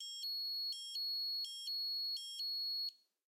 Proximity Card Door Lock - Already Open
Slightly benign beeping of an already-open proximity card door lock when it accepts the card being held in front of it. Brighton, May 2016. Recorded with a Zoom H4n close-up, some noise reduction using iZotope RX5.